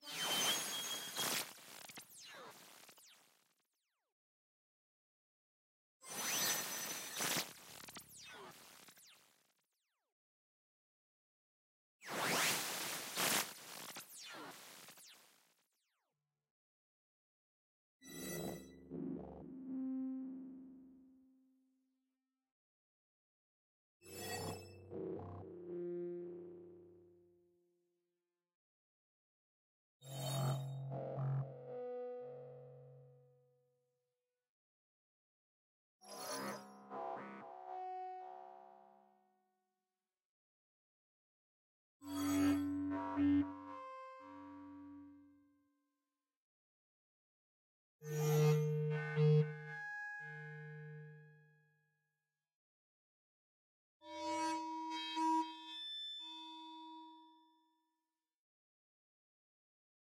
Random glitchy FM noises with different modulation rates.